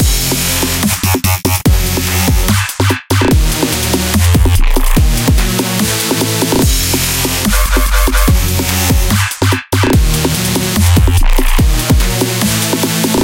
Dubstep FL Studio + Vital Test
A pretty cool totally loopable dubstep test. Made in FL Studio 20.
hard, glitch-hop, techno, dance, hardstyle, fx, dub-step, reverb, panning, sound, pan, dubstep, electro, house, echo, bounce, bomb, delay, drop, ambient, effect, club, electronic, minimal, dub, fail, rave, trance, acid